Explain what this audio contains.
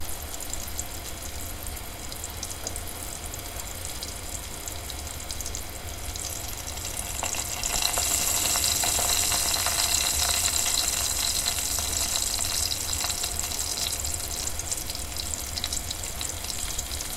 Potatoes fried in a pan.
Recorded 2012-09-28 09:40 pm.